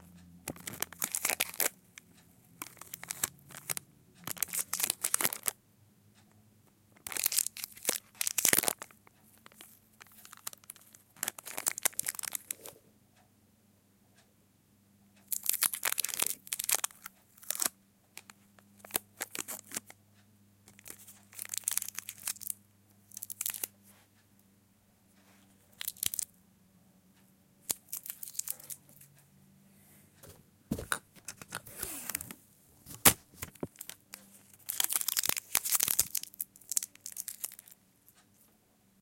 Candy Wrapper Foley!